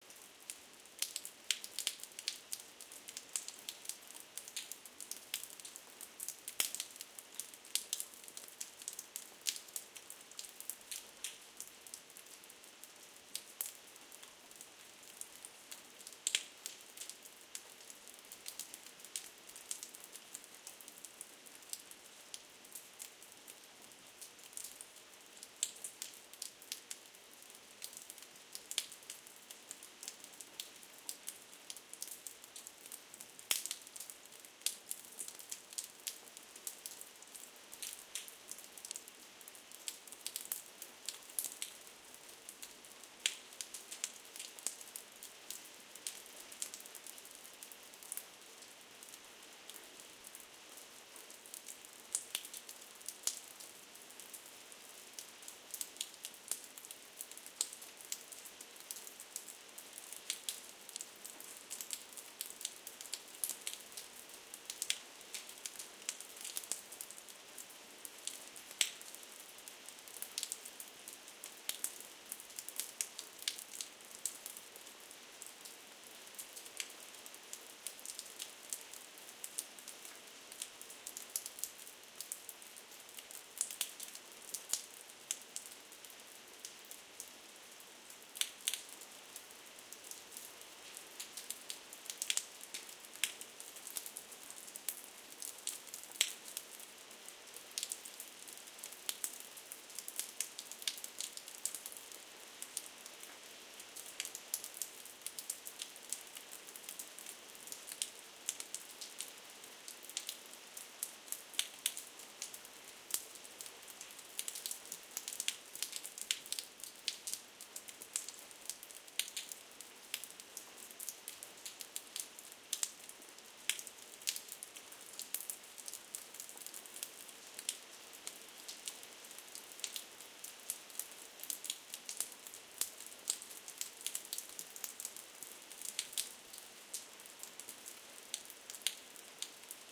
Rain,Patio, Light, Smacky
Rain in my backyard, tile floor cement walls
Recorded with an h4
patio, light, rain